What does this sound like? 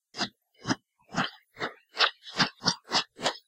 Sword In Air
A few slashes with a long dagger i have got at home.
Not high quality, but enough for my application at least.